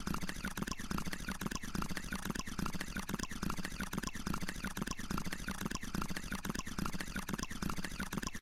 Bubbling water being looped